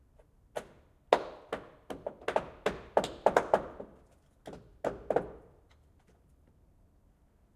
The house opposite of mine gets a new roof and I have an extra alarm clock. The recorded sound is that of the craftsmen building the wooden construction. Marantz PMD670 with AT826, recorded from some 10 metres away. Unprocessed.
roof, wood, build, environmental-sounds-research, field-recording, hammer, construction, building, hammering